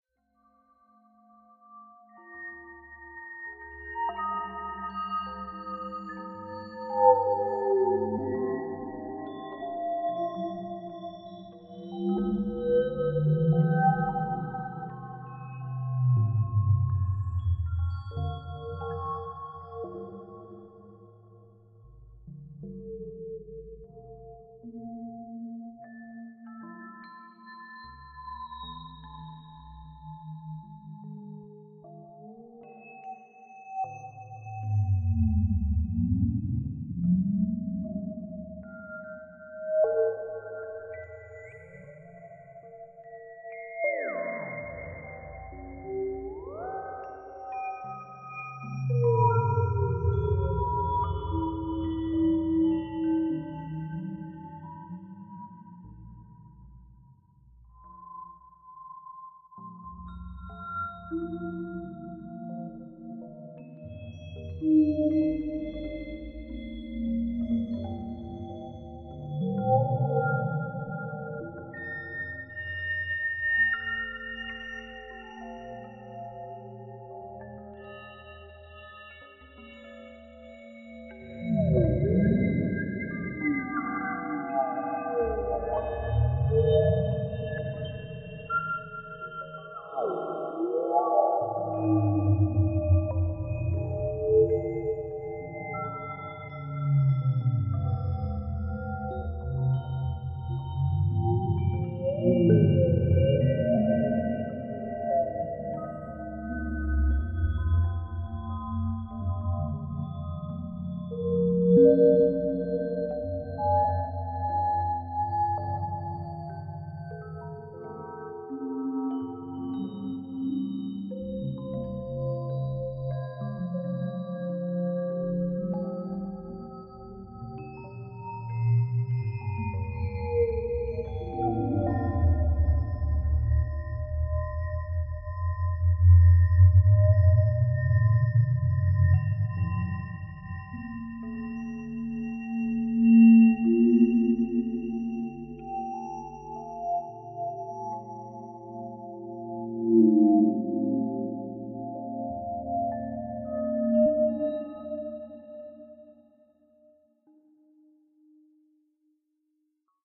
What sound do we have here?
An abstract soundscape created in Zebra depicting a melodic interpretation of radio wave transmission in outer space.
synthesized, abstract, soundscape, Zebra